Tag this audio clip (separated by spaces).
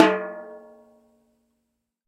1-shot drum tom